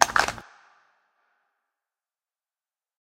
Bersa Holster1
A Bersa 22lr Handgun being holsterd